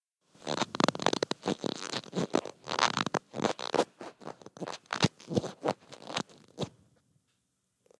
dhunhero cartoonstretch rubbingmiccover
I messed around with my microphone and rubbed its cover, and I had the idea to record it. So I did, and I made a stretchy-like sound effect.
cover, stretch, rub